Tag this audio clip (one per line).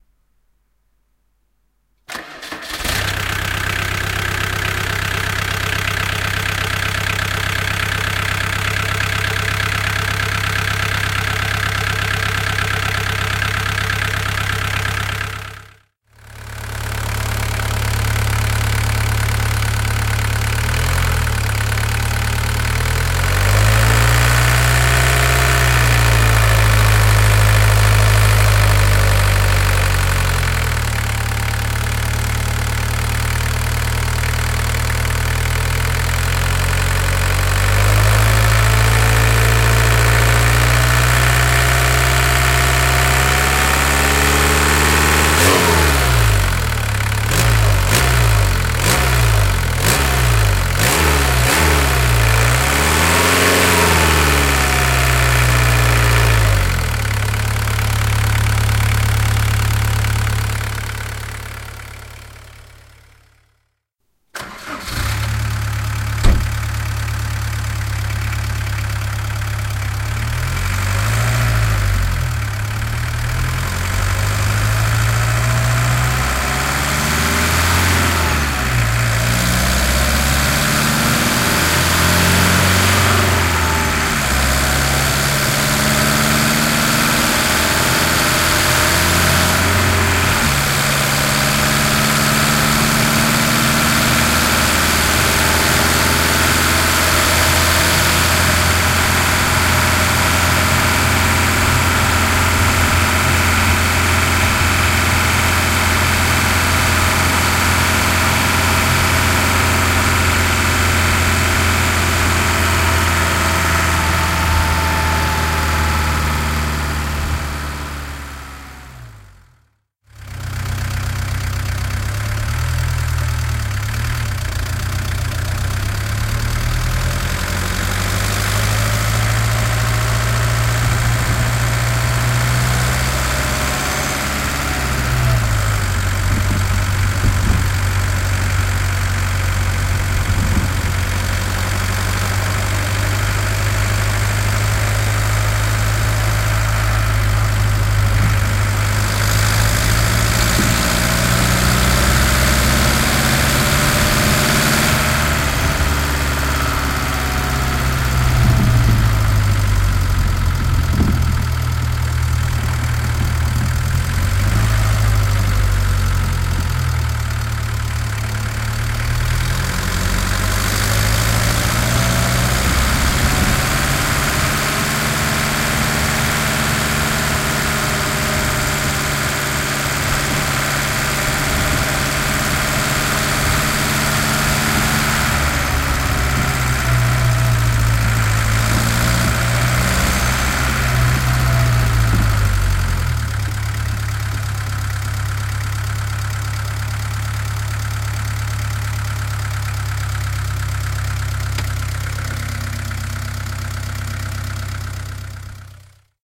aaa
diesel
vw
car